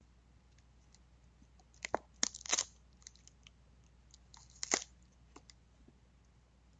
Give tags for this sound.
desgarre arbol desarme

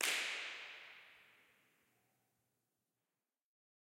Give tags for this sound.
sanctuary ambient third impulse location-recording avenue united choir church response

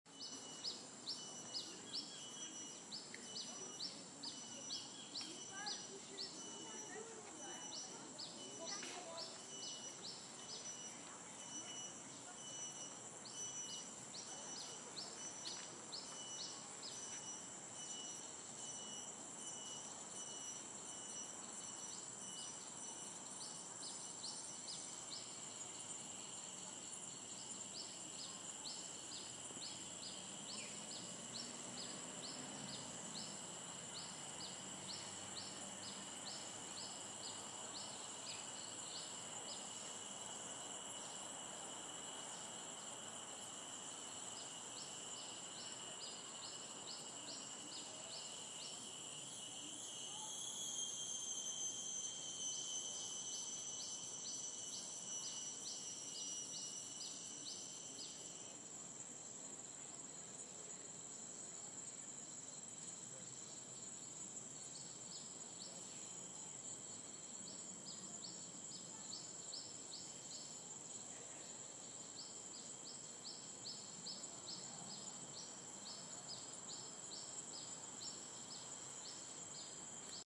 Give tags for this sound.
ambience ambient atmosphere bird birds forest nature

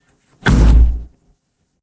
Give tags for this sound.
bang boom door hit impact punch wood wooden

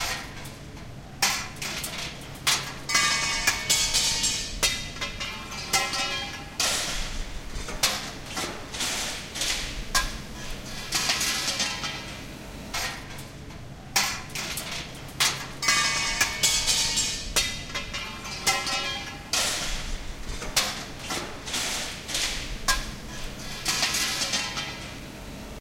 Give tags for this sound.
2 loop metal rambla

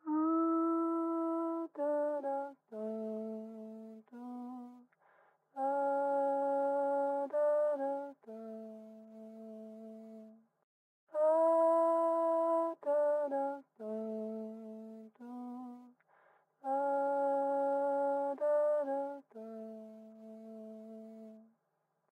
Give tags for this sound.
filter loop notch voice vox

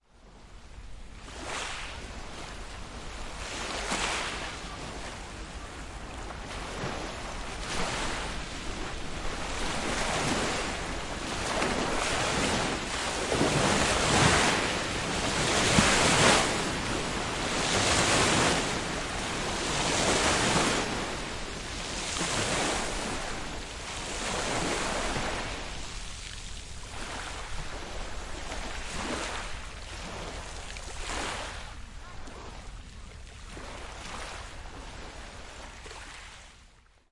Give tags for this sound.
intense sea shore waves

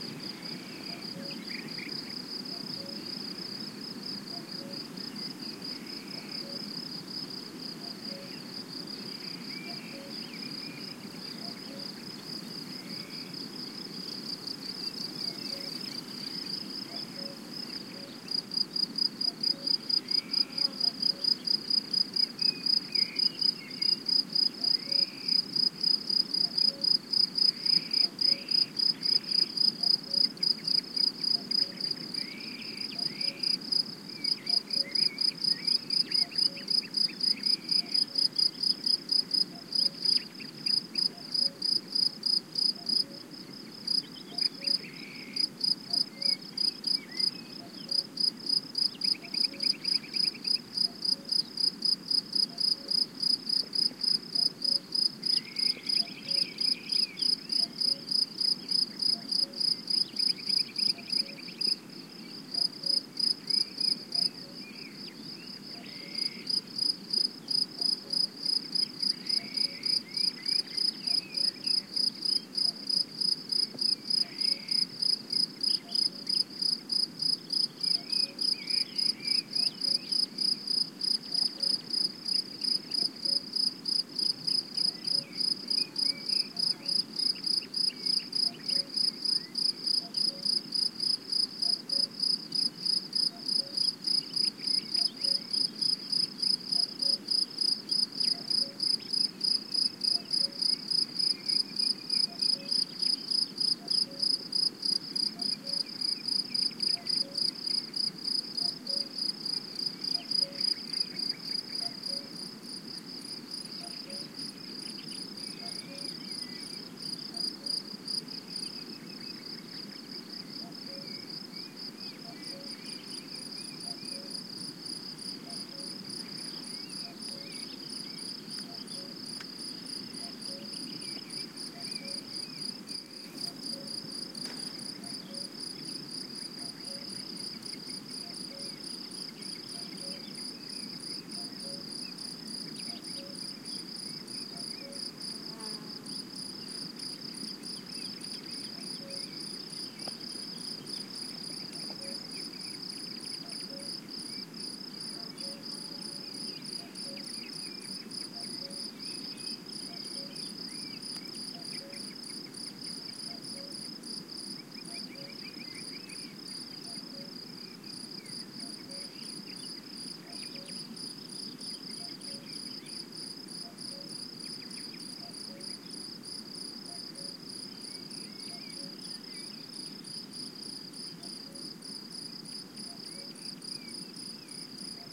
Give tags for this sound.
crickets cuckoo field-recording insects nature Spain spring

